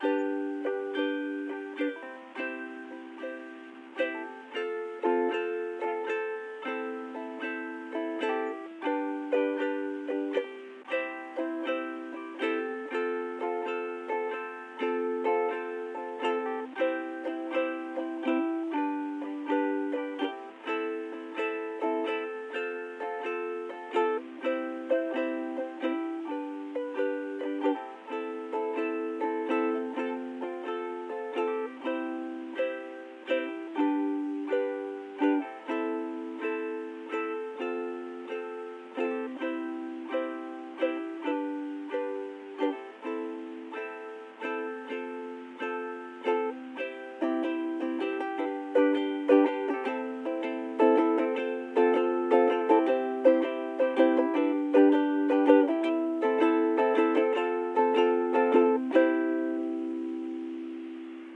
Simple strumming on a ukulele.
ukulele, chords, strumming